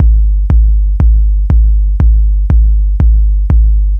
homemade beat loop in live 7 using non sample based synth instrument.
4x4, beat, deep, drum, electronic, house, kick, loop, part, synth, techno